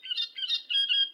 20070506.rubber.duckies.05
squeaks from actual birds (Coot, Great Reed Warbler, Little Grebe) which may remind a toy.
squeaking, quack, funny, toy, field-recording, bird